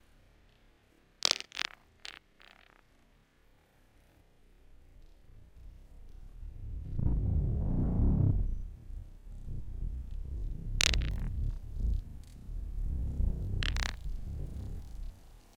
A recording of a small rock being thrown at other small rocks using the Zoom H6 with the included XY mic with edited tempo and pitch to try to make it sound more mechanical.